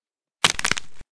pretty clean(a little noise in the end);
breaking neck/bone;
neck, bone, breaking, break